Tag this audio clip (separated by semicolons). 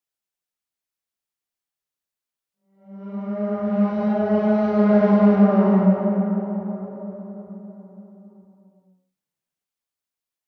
animal; lion-roar; whale